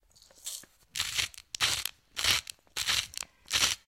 saltmill long
rotate a salt mill, crushing sound of salt
kitchen, salt, rotate, mill